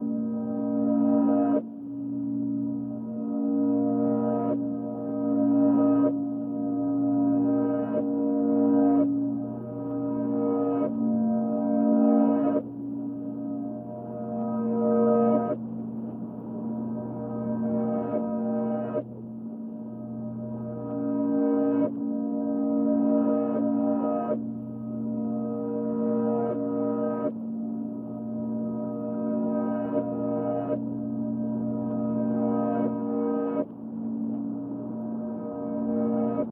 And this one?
Horror Atmosphere
Some good old fashioned horror ambience. Made using Mixcraft 9.
loop, Ambience, digital, Horror